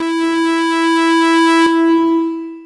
K5005 multisample 01 Sawscape E3
This sample is part of the "K5005 multisample 01 Sawscape" sample pack.
It is a multisample to import into your favorite sampler. It is a patch
based on saw waves with some reverb
on it and can be used as short pad sound unless you loop it of course.
In the sample pack there are 16 samples evenly spread across 5 octaves
(C1 till C6). The note in the sample name (C, E or G#) does indicate
the pitch of the sound. The sound was created with the K5005 ensemble
from the user library of Reaktor. After that normalizing and fades were applied within Cubase SX.
multisample, reaktor, saw, pad